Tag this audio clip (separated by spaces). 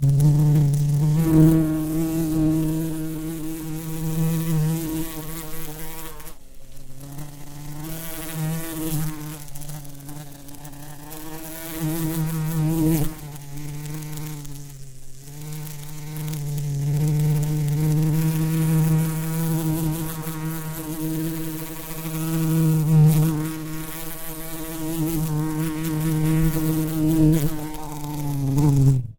Bee Bumble-bee insect